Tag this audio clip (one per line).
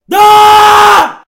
Shouting
NT2-a
Shout
Male
666moviescreams
Rode
Scream